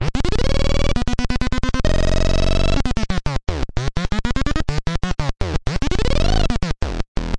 mr. alias pro sequenced and automated in Renoise